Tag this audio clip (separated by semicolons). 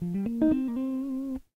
collab-2; el; guitar; Jordan-Mills; lo-fi; lofi; mojomills; tape; vintage